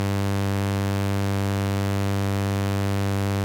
Roland JP-8080 Initialized Synth wave
Just a basic single wave form sample from a real Roland JP-8080 Saw wave in the key of G.
The Sample is tuned to the key of G, You will have to make sure that the sample is set to the key of C or Transpose up 5 Semitones. I correct this in the new template update; so that all chords and Arps are in sync w/ the correct key.
Filter, JP-8080, Synth, saw, Electronic, multisaw, LFO, sawwave, hypersaw, Digital, Roland, supersaw, Init, logicprohacks, Analog, Syntesis